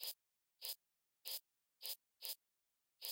QUENTIN Agathe 2013 2014 son4
This sound has been created with a software : Audacity. Created from a sine wave, some effects were applied to represent a sound of a spray (health thematic).
Effets : égalisation, graves et aigus modifiés, tempo modifié
Typologie
itération complexe
Morphologie
Masse : son non continu et complexe
Timbre : terne
Grain : rugueux
Allure : pas de vibrato
Dynamique : attaque abrupte
Profil mélodique : pas de hauteurs ne s’entendent particulièrement dans le son
Calibre : pas de filtre
wash, housework, spray